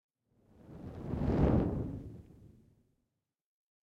20 FIACCOLA PASS
effects, torches